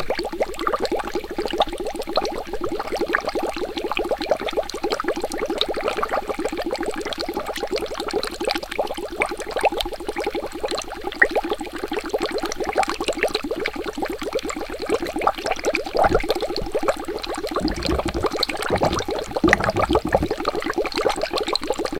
Bubbles being blown through a straw into a glass of water. Works well as a looping sound effect.